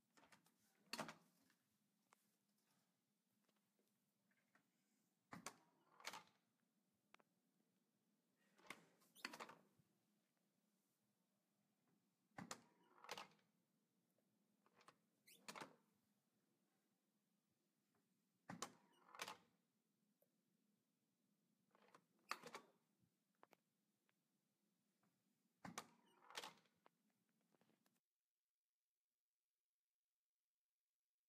Music Box Door Open-Close.L
Recorded a music practice room door opening and closing a few times.